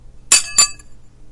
Dropped and threw some 3.5" hard disk platters in various ways.
Ting and long ring out followed by deadened ting